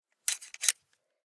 Studio recordings of handling a small metal mechanical device for foley purposes.
Originally used to foley handling sounds of a tattoo machine, but could also be used for guns, surgical instruments etc.
Recorded with an AT-4047/SV large-diaphragm condenser mic.
In this clip, I am sliding back an adjustment screw across a groove.